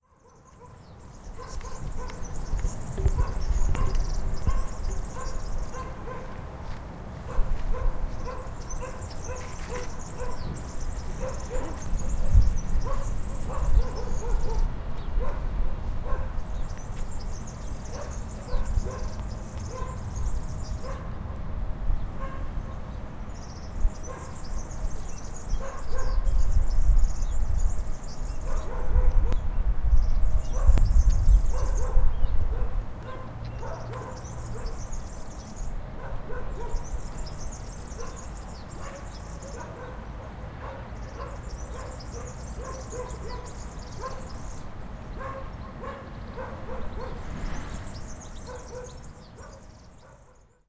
Gafarró 2 Adrián, Lídia i Shelly
En aquest enregistrament s'escolta un gafarró a sobre d'un arbre que estava al costat d'un estany. Això ha estat al parc de la Solidaritat al Prat de Llobregat.